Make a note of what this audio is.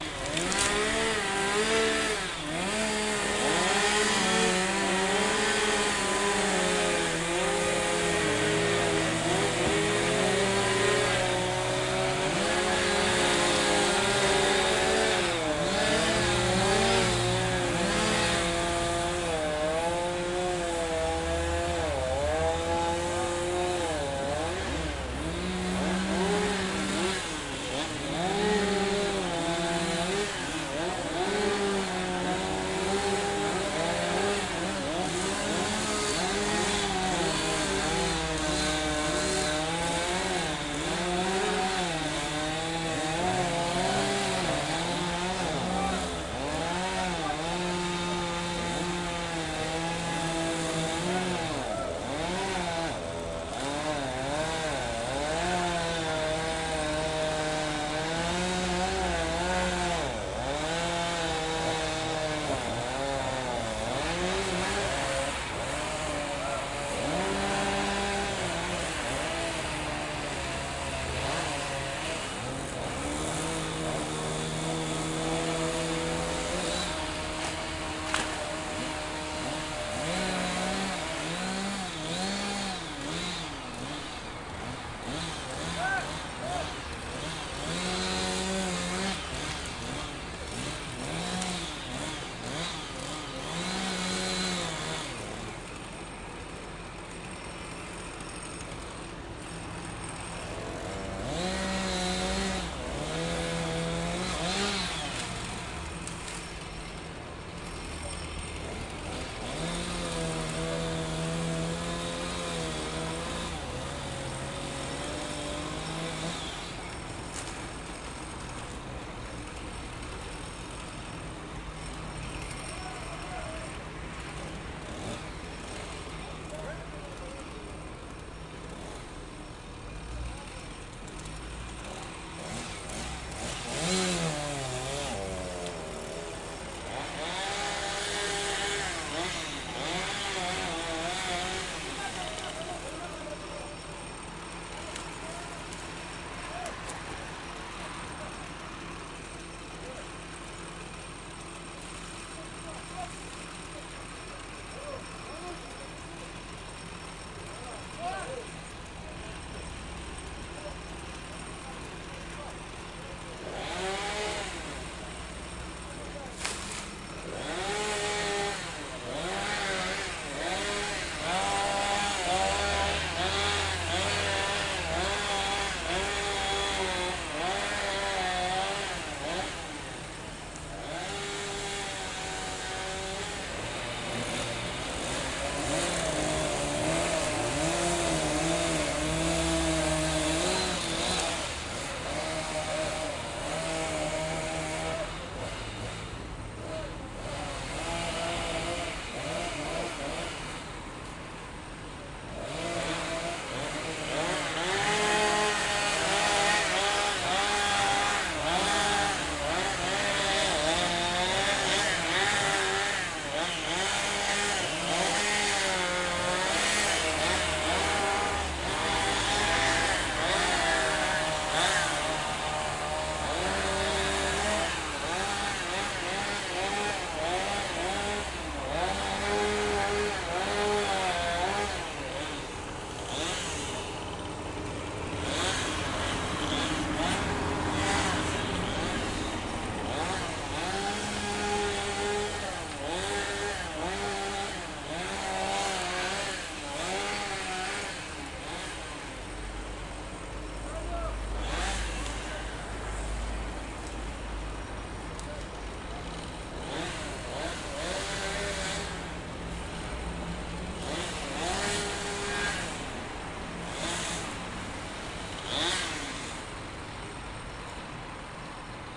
Cutting trees. Sound recorded across the noisy street from opened window on second floor.
Recorded: 2013-03-11.
AB-stereo